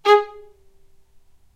violin spiccato G#3
spiccato, violin